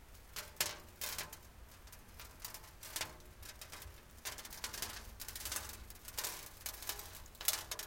sand pour on metal FF666
sand, sand pour on metal, metal
metal, sand